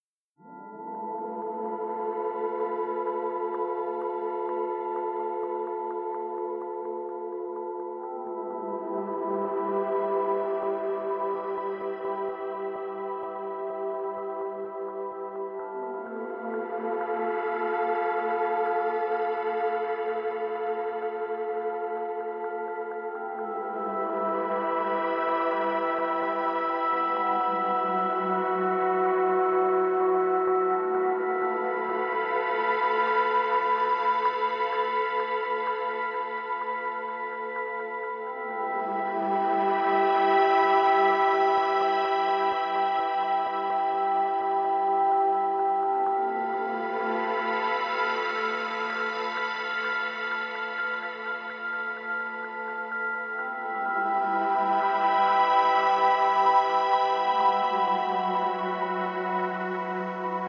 atmo pad
Three pads combined.
Reason 9.
ambient, atmo, atmosphere, atmospheric, electronic, hypnotic, pad, secret